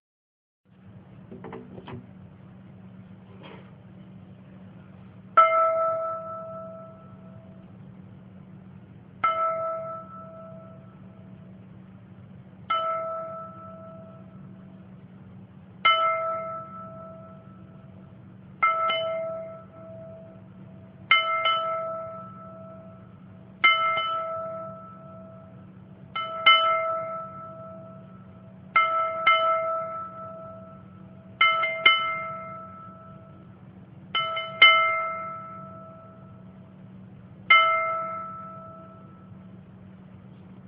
China bowl 12.25.2011
China bowl sample, me thumping the side with my finger. recorded on cell phone.
bowl, china, field-recording